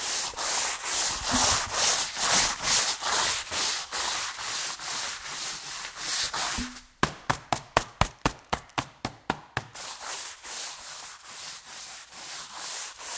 sonicsnaps LBFR Bhaar,Estella
Here are the recordings after a hunting sounds made in all the school. It's a broom
La, Rennes